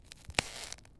Light Crack and Stress
Light stress sound of an ice sheet, by a foot and sneaker.
crack, foot, ice, outdoor, sheet, step, winter